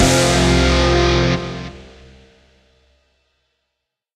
guitar and drums (1/2) 90bpm Fsus
distorted-Guitar, short